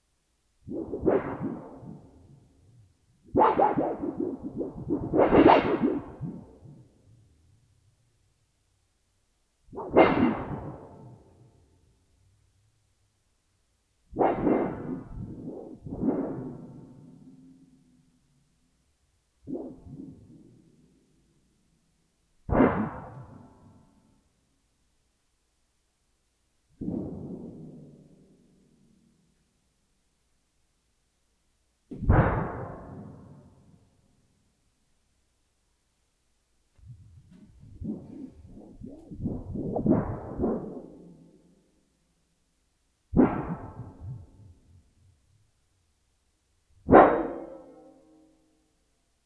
Unedited sequence of sheet metal sounds. I am supposed to be fixing the grill with a piece of sheet metal but when I picked it up I heard the noise and could not resist. All I hear is more hiss. Must be the Samson USB microphone.
clang, boing, bang, metal